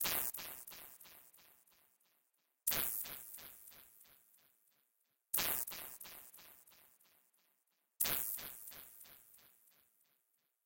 the cube sampleo agudillo
They have been created with diverse software on Windows and Linux (drumboxes, synths and samplers) and processed with some FX.
fx, the-cube, loops, bass, remix, synths, percussion, bassdrum, sample-pack, pack